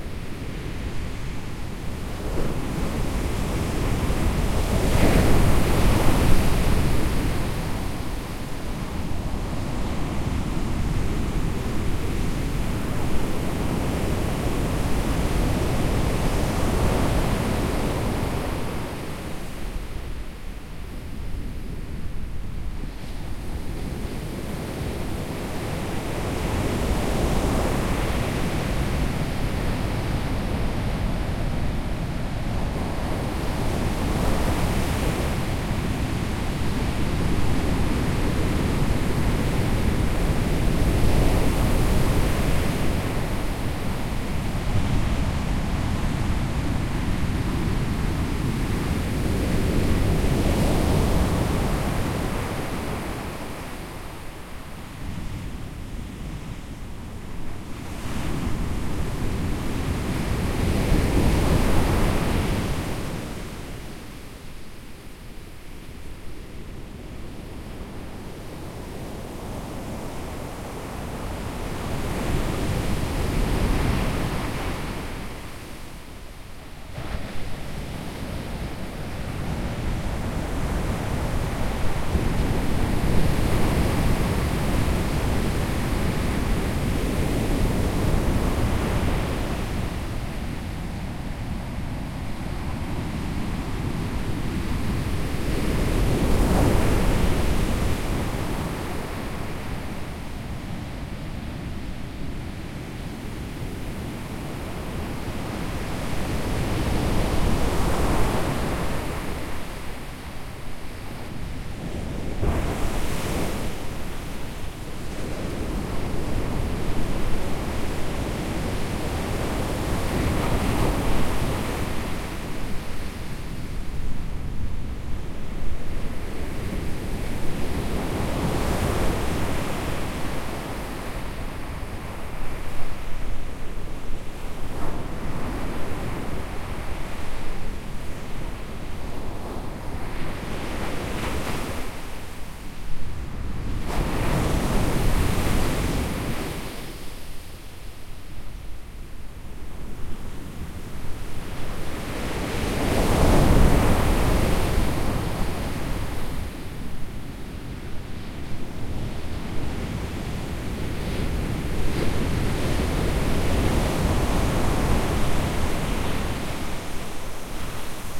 porto 22-05-14 waves during a storm, wind .1
Breaking waves in a stormy day with wind, sand beach
ocean, water, sea-side, atlantic, storm, waves, rock, beach, binaural, wind